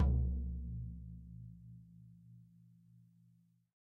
Tom3-Soft
These are samples I have recorded in my rehearsal room/studio. It's not a fancy studio, but it's something. Each drum is recorded with an SM57 on the top head and an SM58 on the resonant head, which have been mixed together with no phase issues. These samples are unprocessed, except for the kick drum which has had a slight boost in the 80hz region for about +3db to bring out that "in your chest" bass. The samples are originally intended to be used for blending in on recorded drums, hence why there aren't so many variations of the strokes, but I guess you could also use it for pure drum programming if you settle for a not so extremely dynamic and varied drum play/feel. Enjoy these samples, and keep up the good work everyone!
24, bass, bit, dogantimur, drum, erkan, floor, hard, instrument, kick, medium, recorded, sample, snare, soft, studio, tom, unprocessed